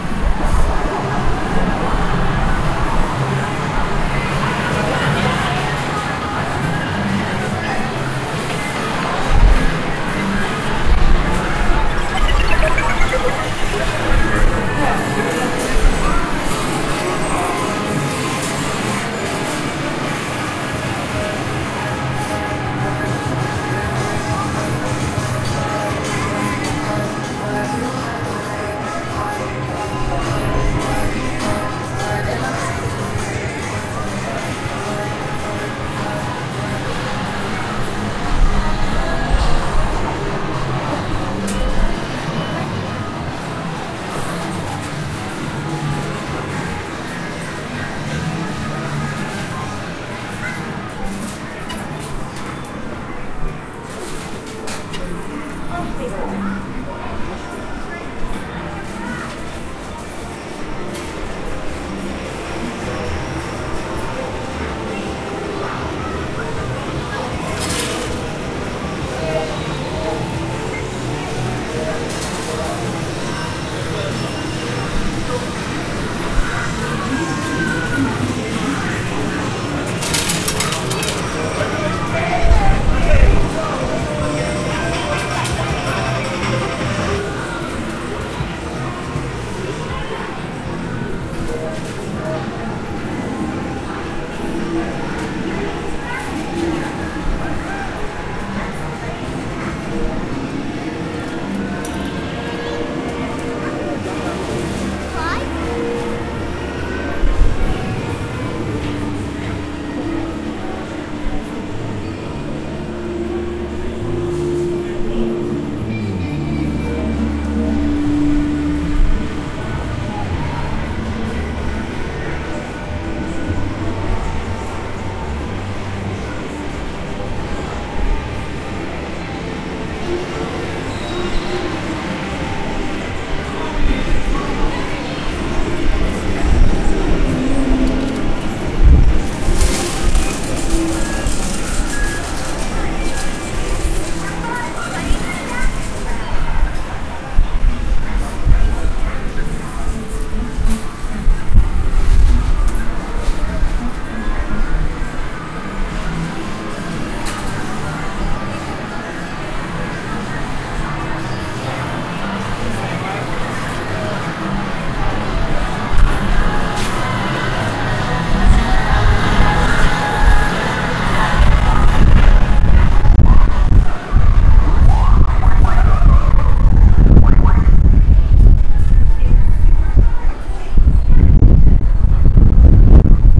Arcade Ambience 2
ambience, coins, computer, Machine, soundscape